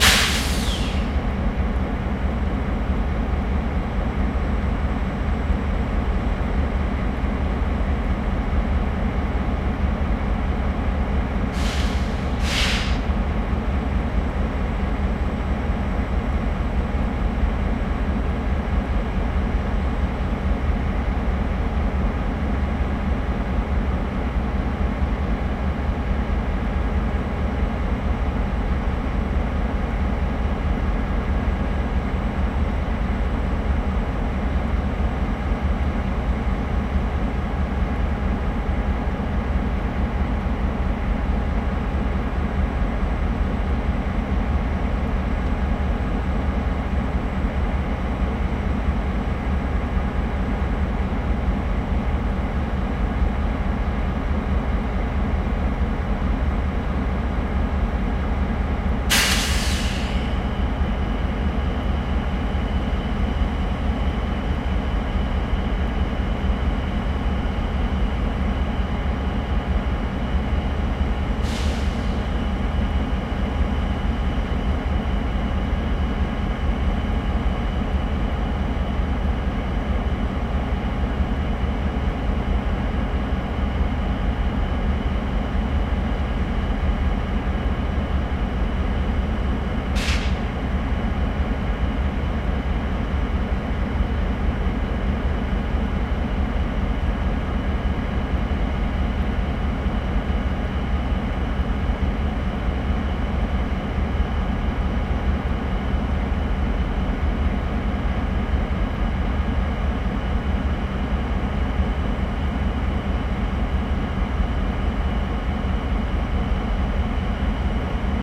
three diesel locomotives idling loop2
Stereo recording of three linked diesel locomotives idling in the train yard. Captured from the side and roughly centre, with work sheds to the left. Recorded with a Zoom H1, mastered in Sound Forge 5. EQ'ed to reduce low-end rumble and edited for easy looping.